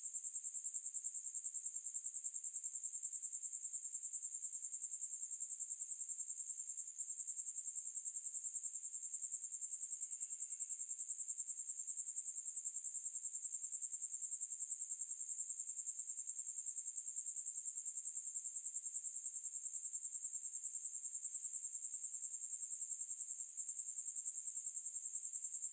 Sound of cricket buzzing at night.